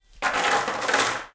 chains thick drop fall
drop, thick, fall, chains